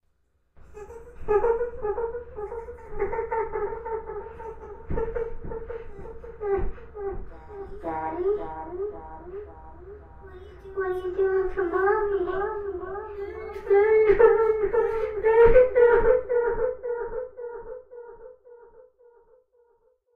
Ghost child crying
I recorded my voice and adjusted the pitch to sound like a child. Hopefully it can be useful to someone's project, but unfortunately it's very specific.